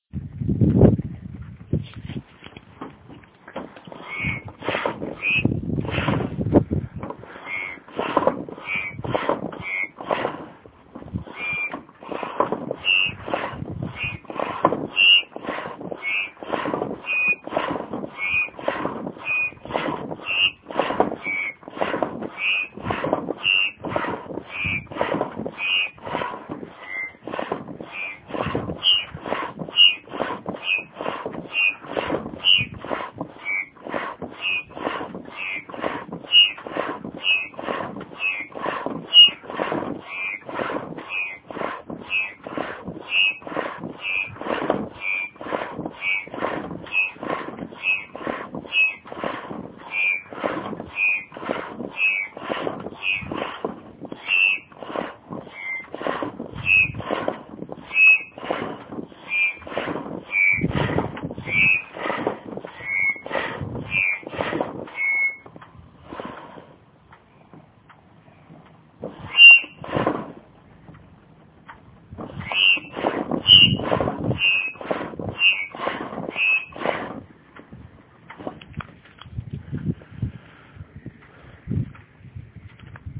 Foot pumping a dingy without the necessary O ring
I was attempting to inflate a dingy using a foot pump without the necessary O ring. The air just came out again with an interesting sound. Recorded on a Huawei G300.
rhythmic, air, whistling, wind